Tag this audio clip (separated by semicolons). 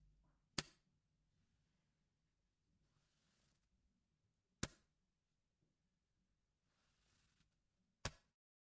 snapping; wrist; rubber-band; snap; OWI; band